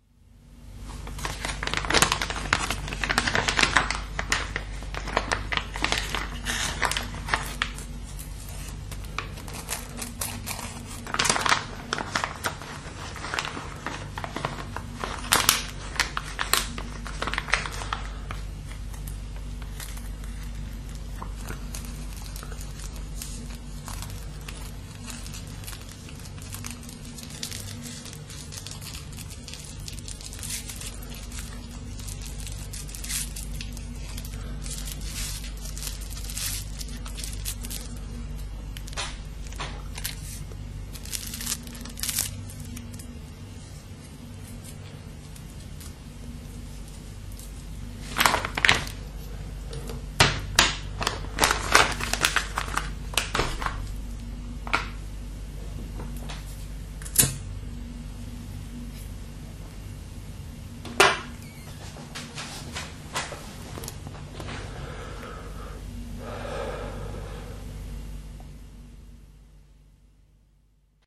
Rolling and lighting a cigarette recorded late at night with my Olympus digital voice recorder WS-100.
household, human, lofi